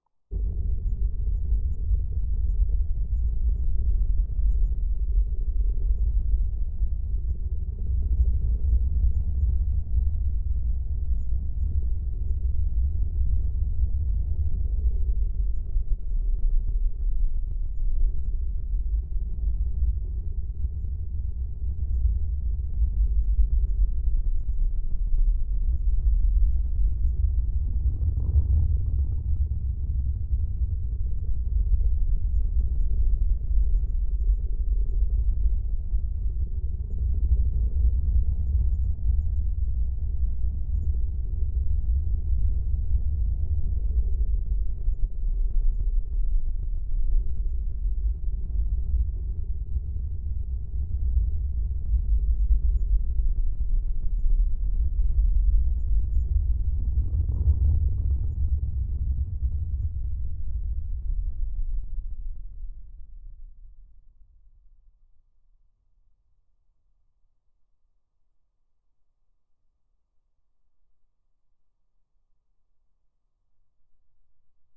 Horrific rumble, I think it would work great for sci-fi set on a space station. Gives you a bit of vertigo as you listen. Can easily be looped.
horror, fi, ambient, creepy, sci-fi, drone, ambience, spooky, scary, atmosphere, sinister, sci, ship, terror, space